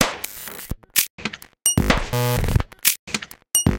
BuzzyPercussion 127bpm01 LoopCache AbstractPercussion
Abstract Percussion Loop made from field recorded found sounds